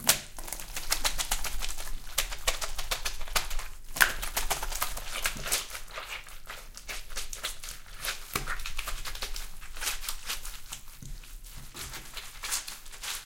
I squish and shake a wet washcloth in the bathroom. Just listen to this, it is probably the coolest sample I have done yet.
squash, squishy, washcloth, water